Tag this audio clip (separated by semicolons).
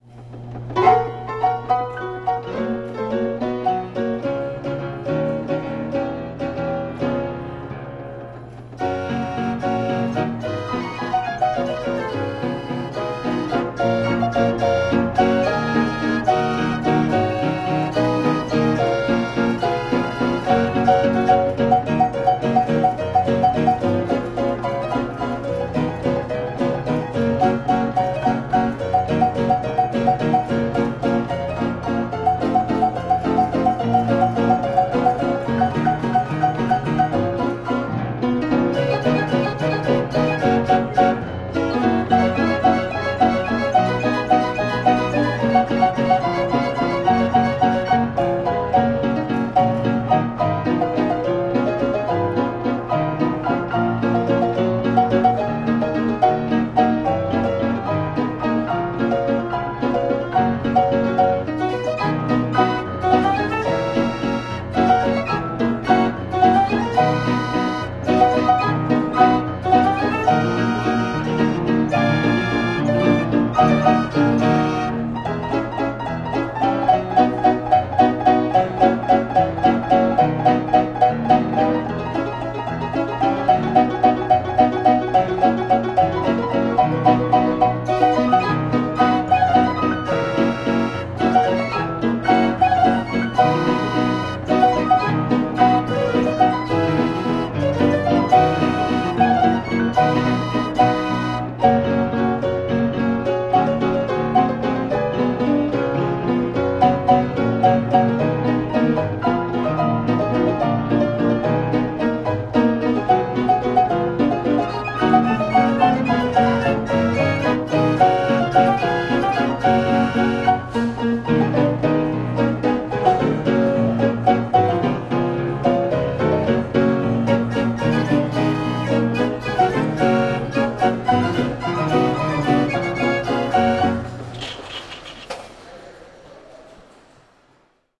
1906; antique; arcade; coin-operated; earthquake; field-recording; fire; Fishmans; game; historic; keys; machine; marvel; Mecanique; mechanical; Musee; museum; music-box; old; old-time; orchestrion; piano; player; player-piano; San-Francisco; survivor; Sutro; upright-grand; vintage; Wharf